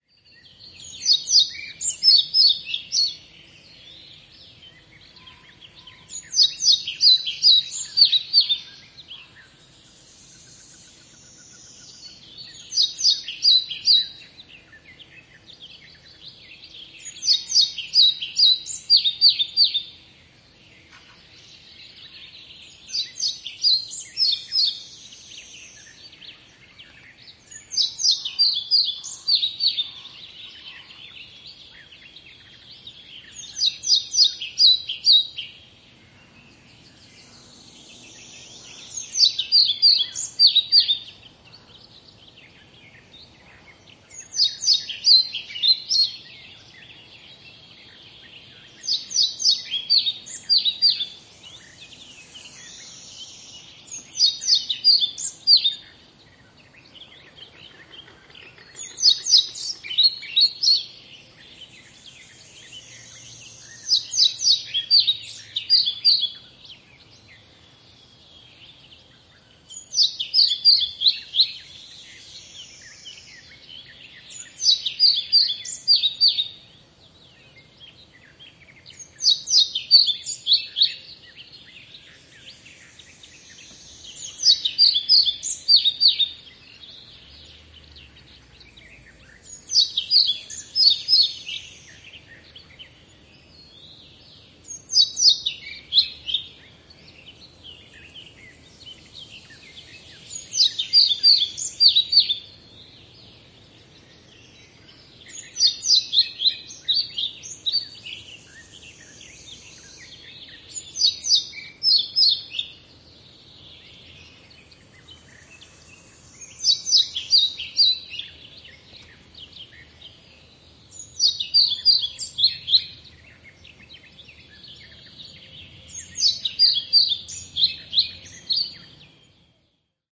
Kirjosieppo laulaa metsässä.Taustalla muita lintuja ja kaukaista liikennettä. (Muscicapa hypoleuca).
Paikka/Place: Suomi / Finland / Kitee, Kesälahti
Aika/Date: 29.05.1990